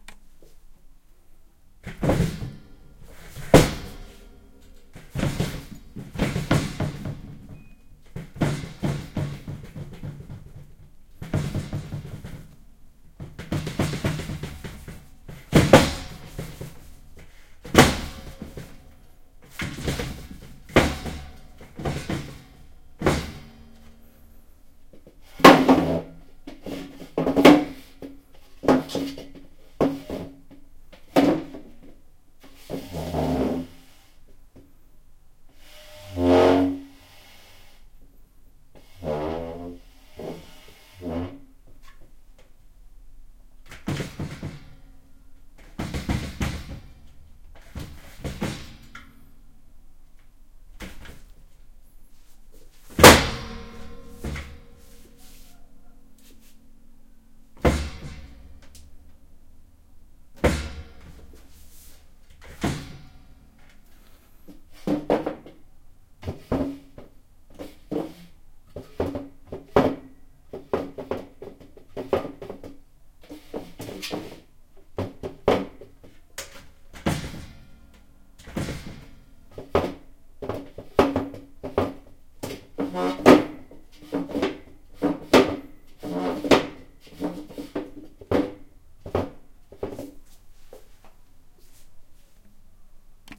a metal chair on hardwood floor: stomping, draging, squeaking
chair, squeaky, furniture, dragging, stomping, metal, floor